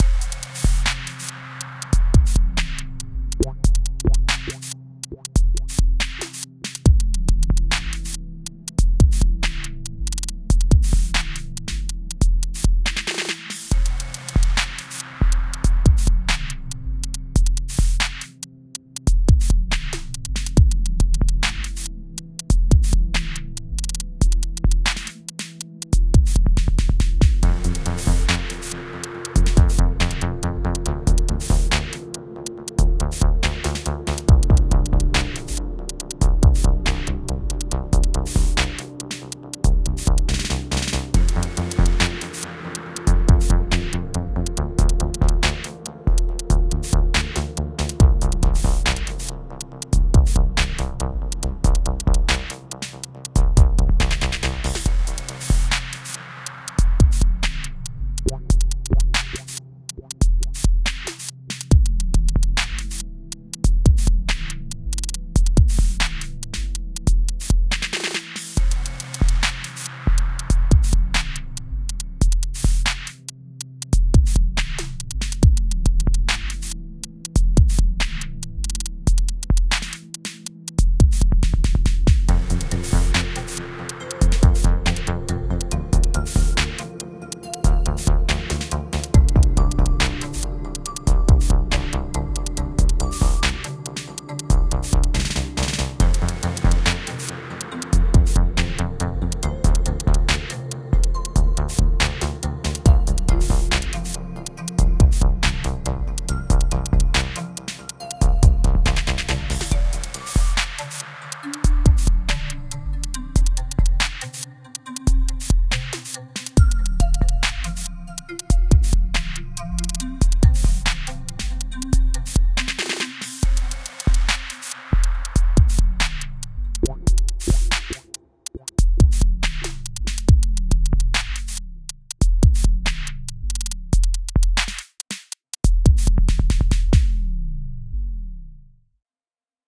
Alien Dream
effect, weird, cosmos, spaceship, groovy, music, dream, strange, edm, laser, ambient